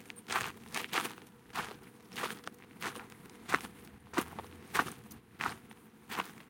A recording of me walking on a dirtpath. If you wanna use it for your work, just notice me in the credits!